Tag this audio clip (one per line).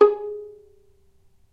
non-vibrato
pizzicato
violin